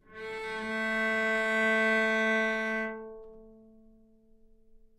Cello - A3 - other
Part of the Good-sounds dataset of monophonic instrumental sounds.
instrument::cello
note::A
octave::3
midi note::45
good-sounds-id::452
dynamic_level::mf
Recorded for experimental purposes